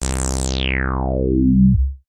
Sounds like a warp down. Made with FL Studio 9